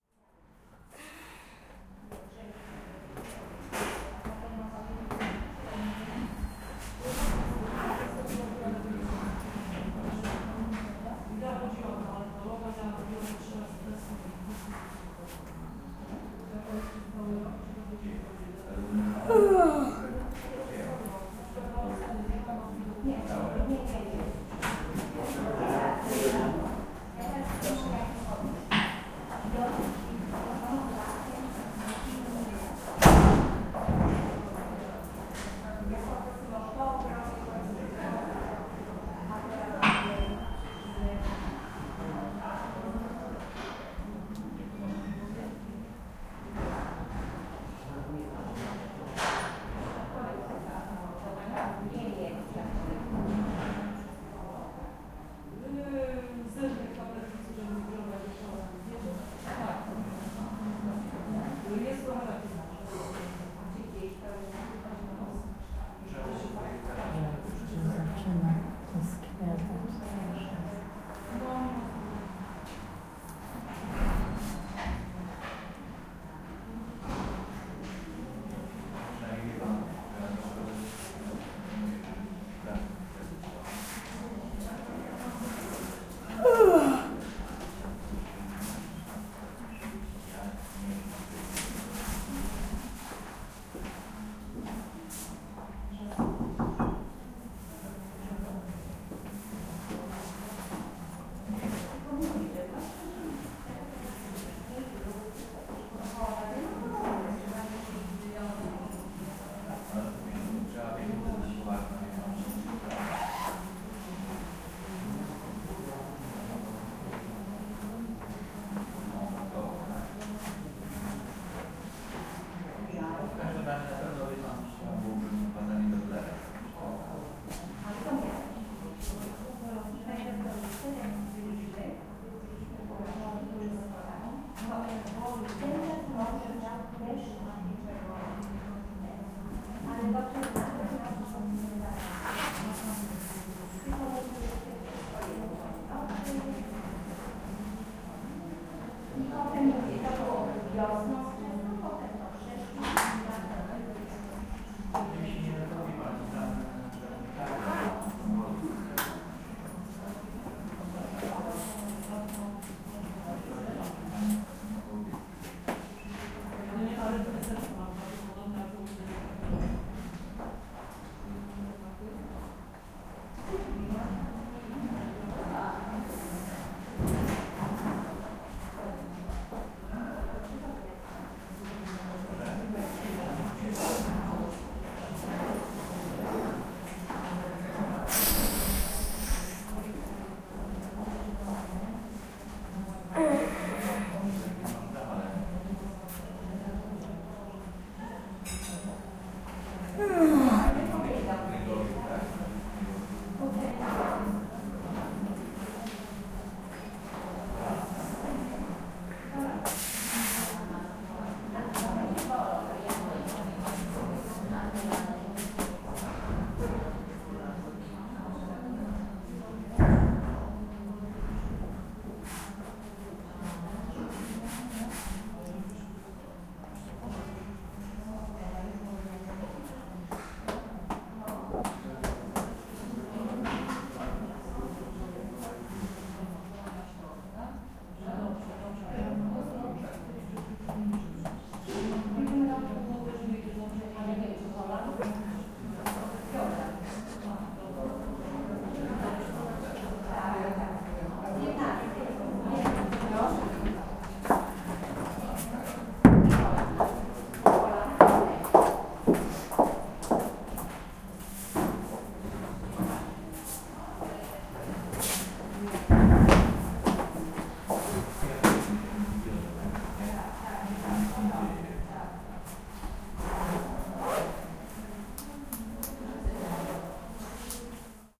17.03.2016: around 15.20. Ambience from the medical center in Bydgoszcz (Poland). Recording made by my student Joanna Janiszewska.